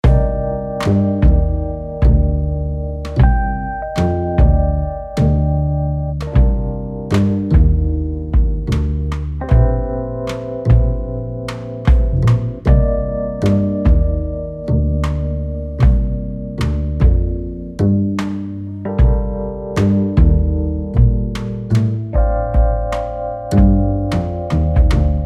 jazzy loop 1
bass, beat, drum-loop, drums, funky, jazz, jazzy, loop, upright-bass